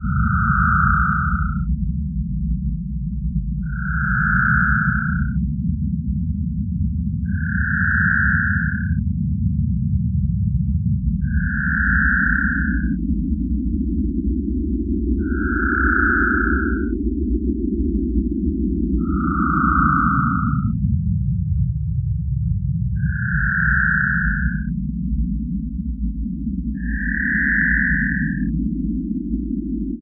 More coagula sounds from images edited in mspaint.
space, ambient, soundscape, tundra, synth